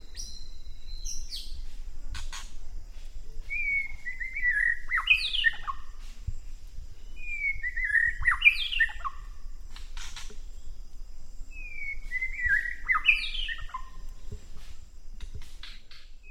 Bird Song 02
Asia, Birds, East, Jungle, Nature, South